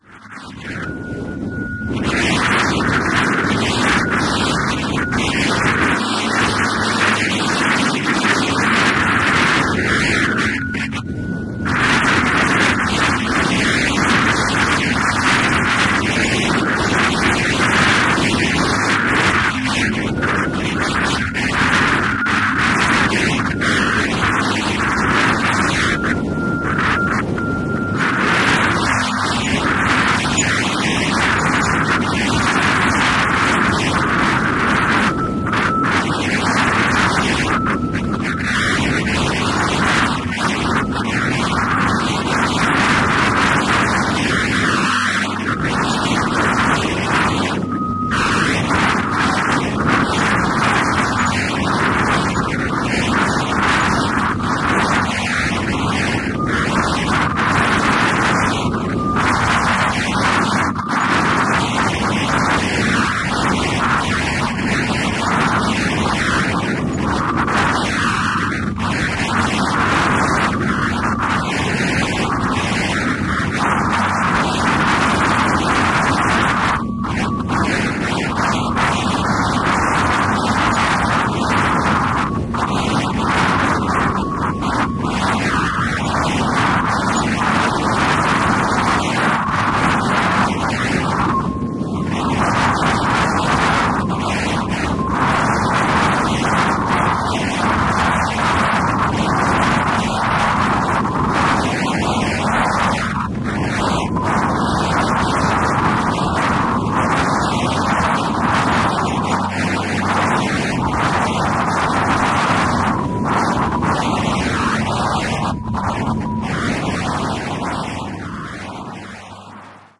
This sample is part of the “Wind” sample pack. Created using Reaktor from Native Instruments. Wind with radio interference.